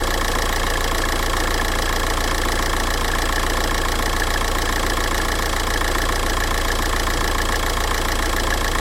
engine idle loop 1
Car engine ticking over
car, engine, idle